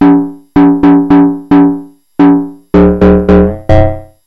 bassdrum line done with a mam adx-1. played by a midisequence by a mam sq-16. pitched sound
mam, ax-1, bassdrum